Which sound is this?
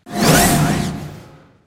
Effects recorded from the field of the ZOOM H6 recorder,and microphone Oktava MK-012-01,and then processed.
transition, noise, morph, woosh, swoosh, stinger, moves, futuristic, cinematic, Sci-fi, opening, dark, metal, abstract, glitch, metalic, hit, rise, game